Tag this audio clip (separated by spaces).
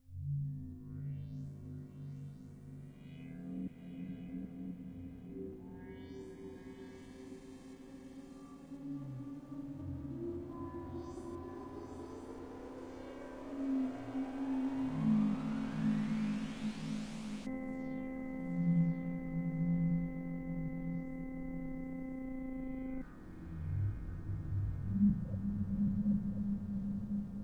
ambient fx space